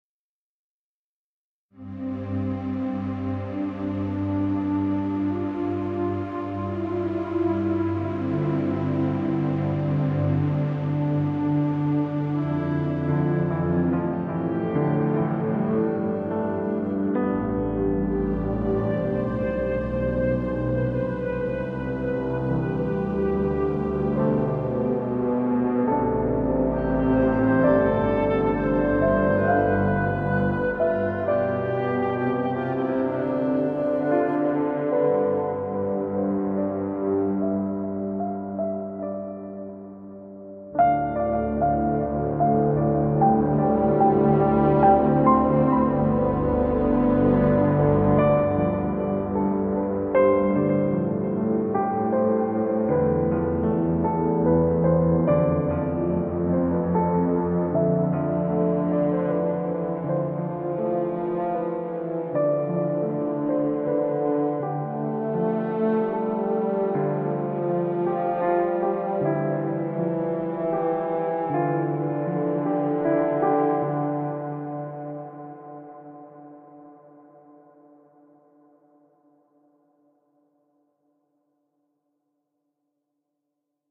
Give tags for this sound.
ambient; cinematic; dramatic; dream; dreamlike; free; intro; orchestral; piano; royalty; skyrim; track

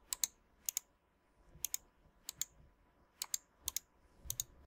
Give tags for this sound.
click; computer; high-quality; mouse; pointer; various

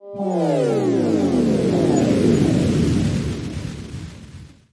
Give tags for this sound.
abstract; effect; electronic; sfx

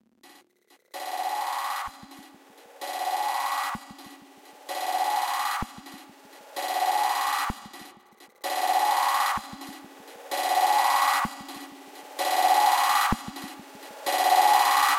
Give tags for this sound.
house; techno; electronica

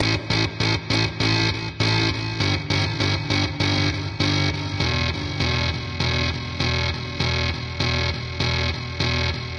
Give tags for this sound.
drums; free; filter; loops; guitar; sounds

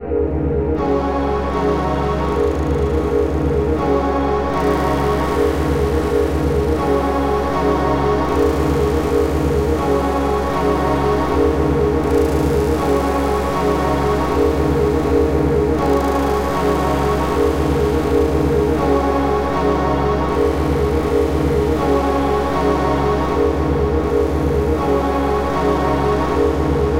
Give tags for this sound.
creepy; film; ambient

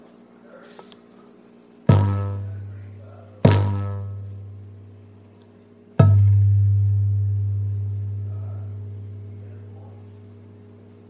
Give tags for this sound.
bass; table

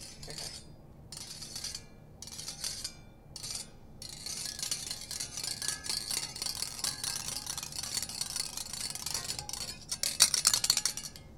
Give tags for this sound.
clink; metal; scary; scraping

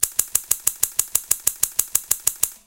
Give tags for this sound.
cut; cutting; scissor; scissors

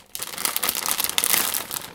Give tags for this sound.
crunching; scrunch; crackling; cookie; crack

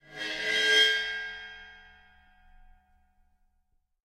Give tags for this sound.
bell; sound; crash; paiste; zildjian; drum; one-shot; hit; splash; bowed; special; beat; percussion; cymbal; drums; groove; sample; cymbals; meinl; china; ride; sabian; metal

